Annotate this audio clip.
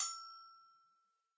Agogo Bell High Velocity06
This pack is a set of samples of a pair of low and high and pitched latin Agogo bell auxilliary percussion instruments. Each bell has been sampled in 20 different volumes progressing from soft to loud. Enjoy!